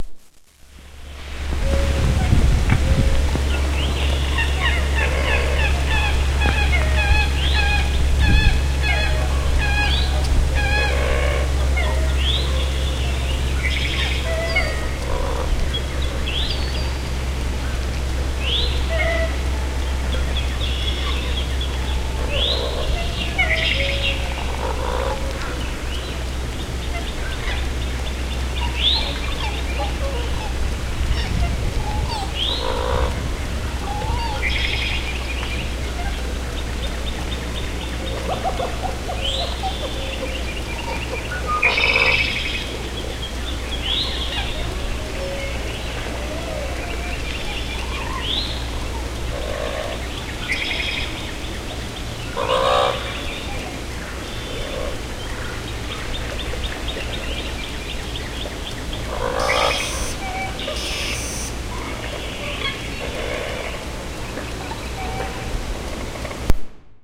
assorted birds recorded at St. Marks Wildlife Refuge in north Florida - morning, March 17, 2015
I just used a Sansa Clip + MP3 player to record, & edited on a Traction T-3 DAW. I normalized, added a touch of verb & ran it through a mastering plug